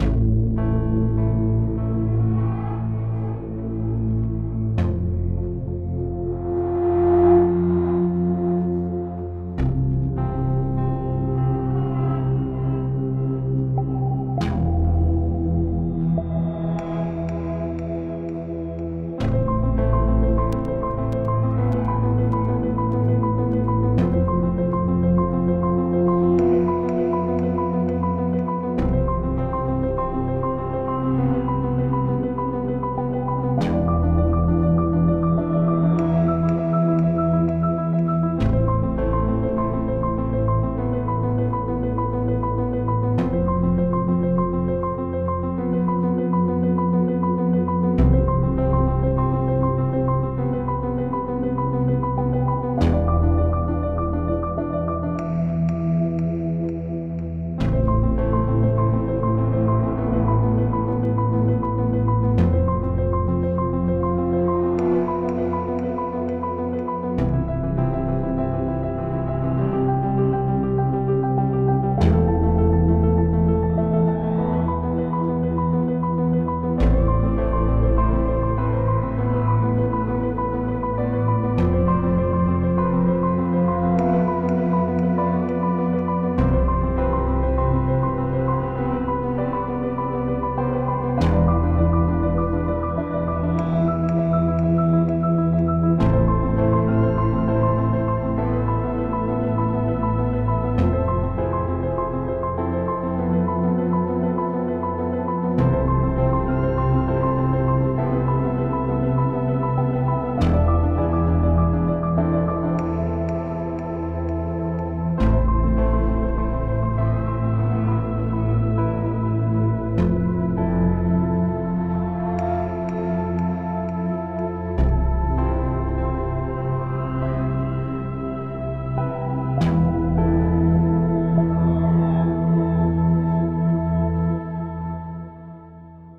Extract of "Aurora" Piano ambiance sound.
Synths:Ableton live,Silenth1,kontakt,masive.